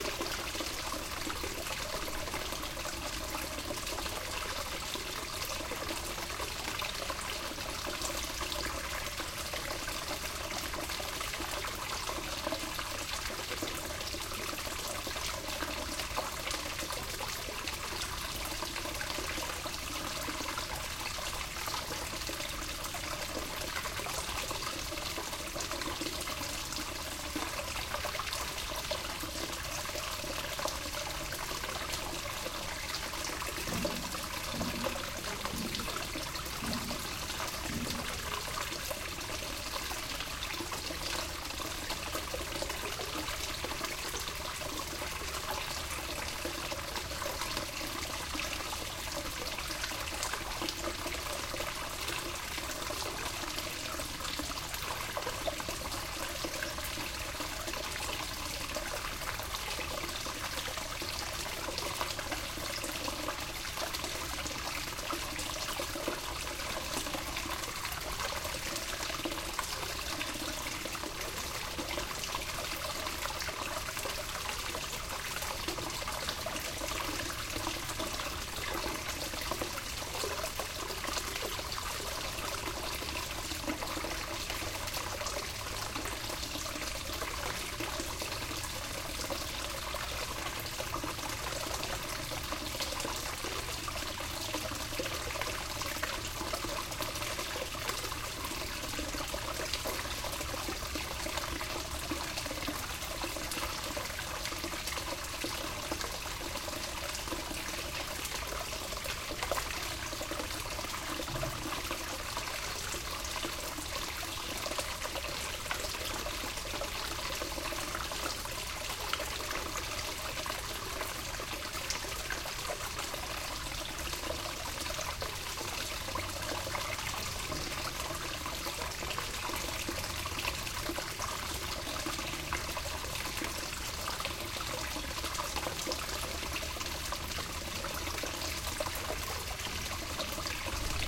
expiration of pond
This recording was done in one of the glasshouses of the "Berggarten" in Hanover / Germany.
Apart from plenty of tropical plants there is a pond in the middle and what you hear is the overflow.
This recording was done with a Zoom H2 recorder, using the rear microphones.
water, field-recording, stream, pond